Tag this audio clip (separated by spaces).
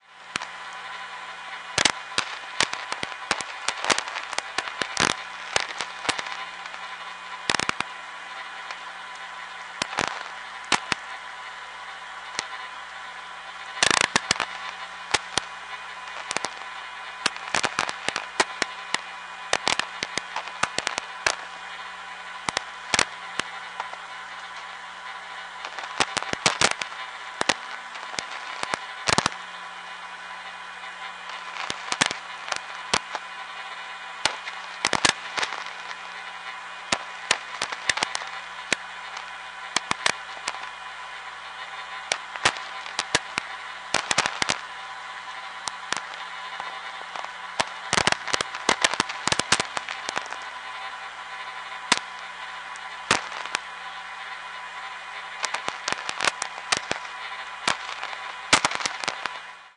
electronic noise radio shortwave static vlf